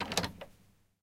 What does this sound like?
locking a door 03
The sound of locking my bathroom door. Therefore it`s a simple key and lock.
lock, stereo, closing-door, bathroom-door, unlock, door, locking-door, unlocking-door, field-recording, locking